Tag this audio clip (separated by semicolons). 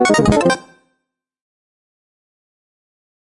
effect; electric; freaky; fx; gameover; gun; pickup; retrogame; sci-fi; sfx; shoot; sound; sounddesign; soundeffect; weapon